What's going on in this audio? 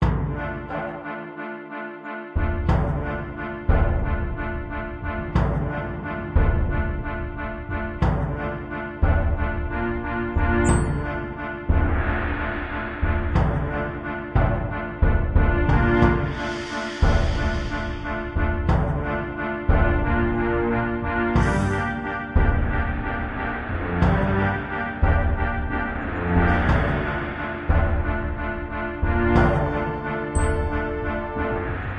Loop Pirates Ahoy 00
A music loop to be used in fast paced games with tons of action for creating an adrenaline rush and somewhat adaptive musical experience.
Game, Video-Game, gamedev, gamedeveloping, games, indiedev, indiegamedev, loop, music, music-loop, victory, videogame, videogames